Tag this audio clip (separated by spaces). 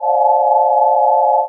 atmosphere sound electronic ambience sci-fi supercollider horn ambient